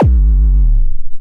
Software generated base drum.
drums, synth, bd